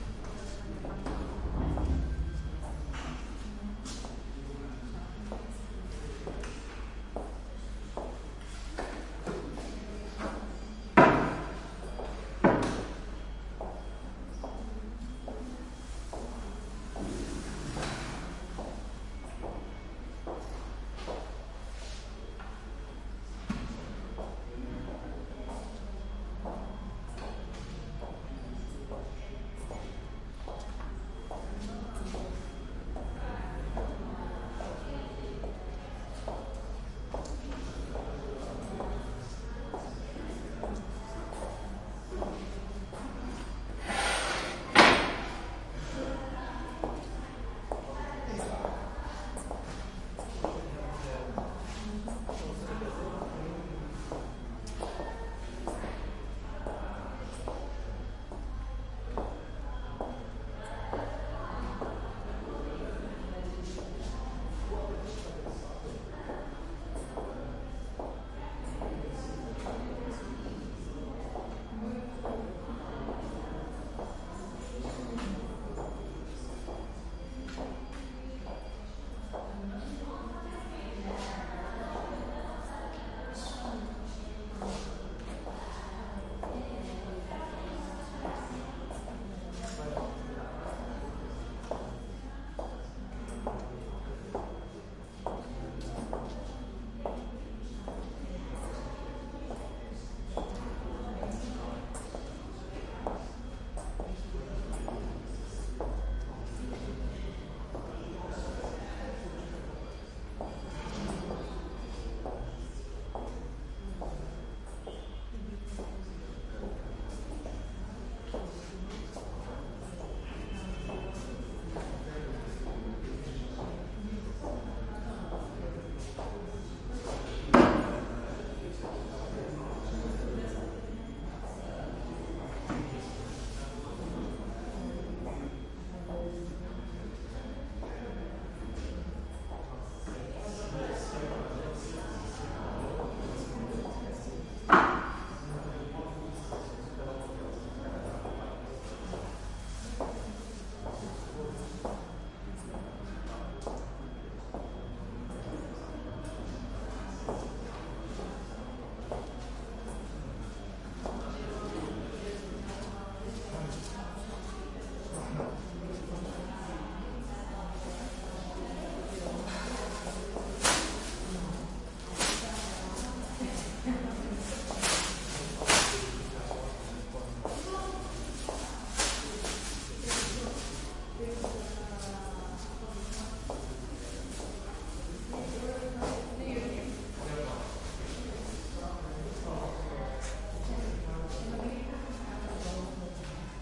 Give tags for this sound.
floor; footsteps; library; quiet

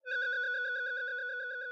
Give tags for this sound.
audio; beat; effext; fx; game; jungle; pc; sfx; sound; vicces

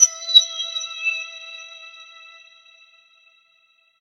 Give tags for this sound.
lead; multisample; resonance

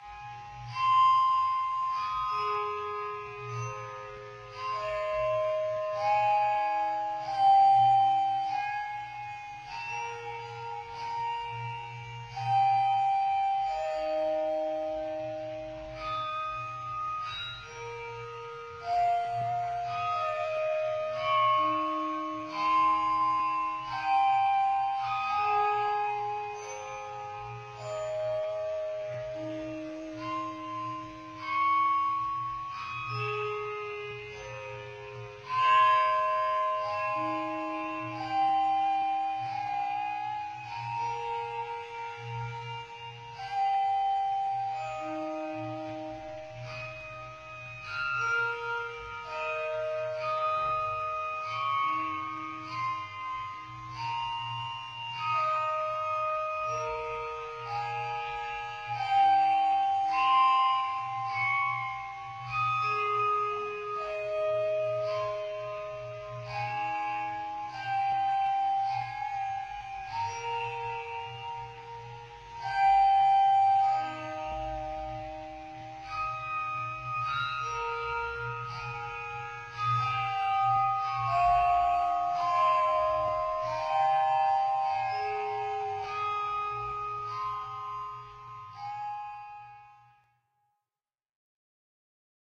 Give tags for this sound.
Antique; metallic; Music-Box

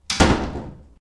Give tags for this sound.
bang; door; slam

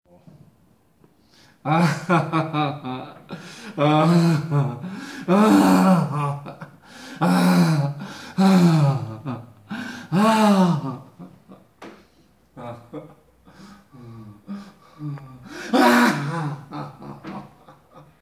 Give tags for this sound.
Llanto; nube; triste

